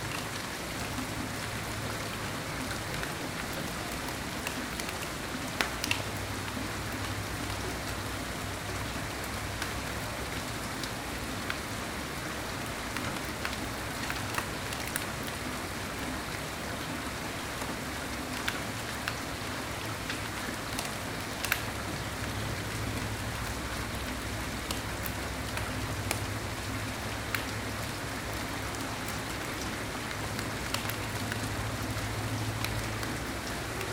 a raw clip of just rain tracked right after a down pour in WA